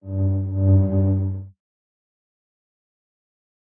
Bass sound
Ambient sounds of Tecnocampus University.
RodeNT3, tfg